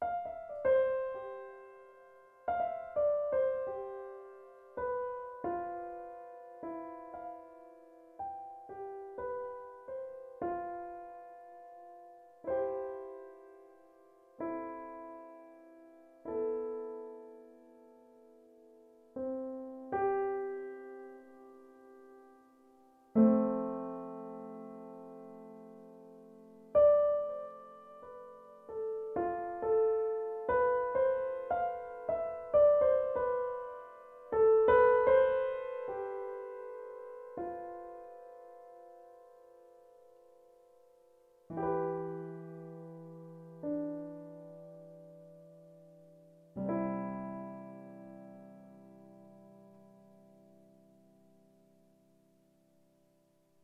Melodic piano released as part of an EP.